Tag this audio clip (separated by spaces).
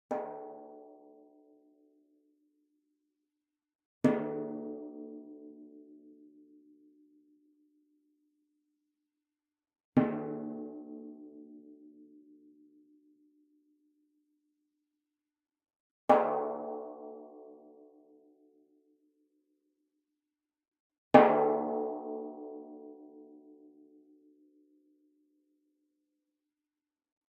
timpani hit drum percussion flickr drums